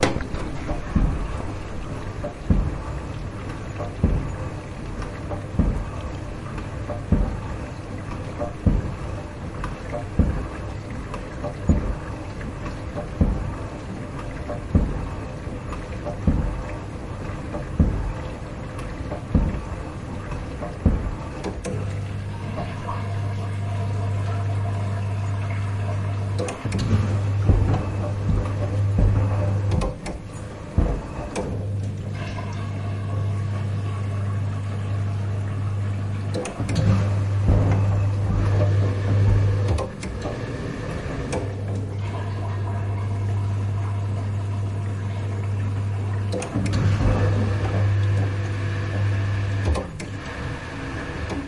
dishwasher running with some displaced piece, gently drumming inside. recorded with a tascam dr-07's builtin microphone, pressed against the outer casing.
cycle, household, machine, switching, wash, washing, water